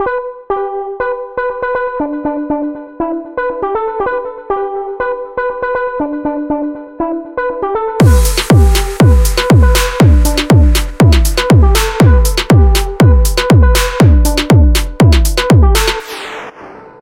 This sound was created using the "The Legend" synthesizer.
BPM 120